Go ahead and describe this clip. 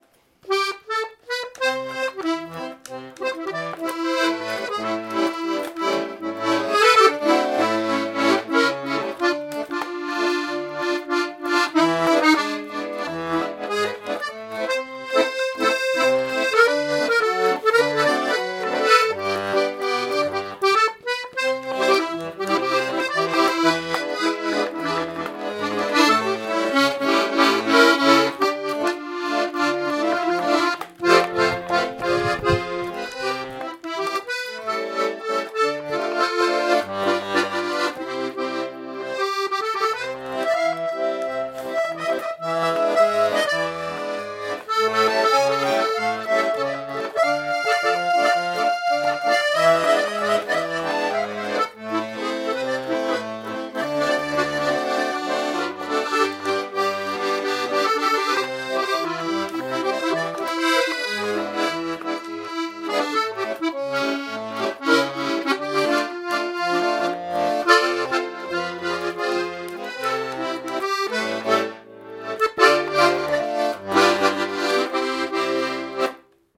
A hilarious song played on accordion. Indoor recording. Recorded with Zoom H2.
accordion, folk, squeezebox